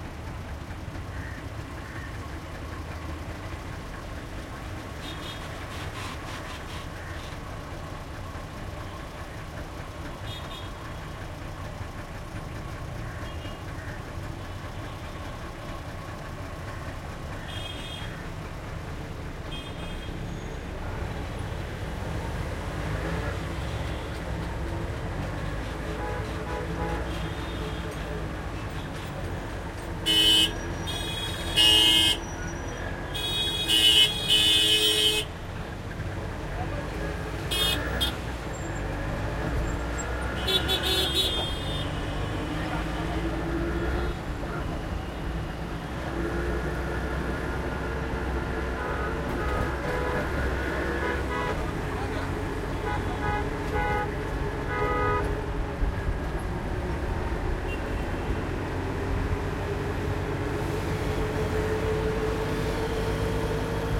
Autorickshaw ride Mumbai 2
Sounds recorded from roads of Mumbai.
field-recording, India, Mumbai, road